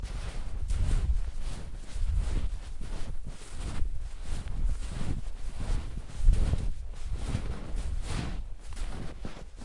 pulsa i djupsnö 1
Walking in very high snow. Recorded with Zoom H4.